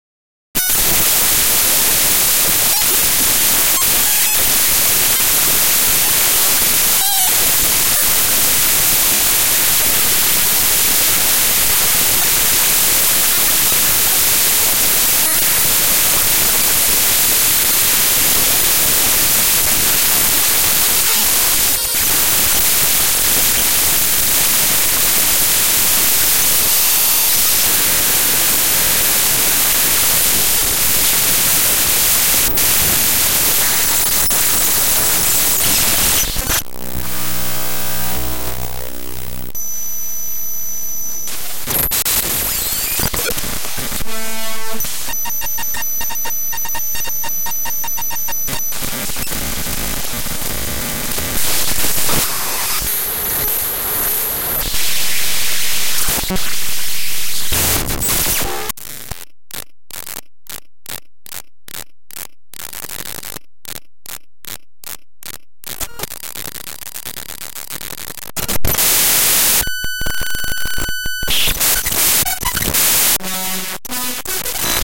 Glitch Noise 4
These are glitch sounds I made through a technique called "databending." Basically I opened several pictures in Audacity, and forced it to play them as sound files.
digital, distortion, glitch, harsh, lo-fi, noise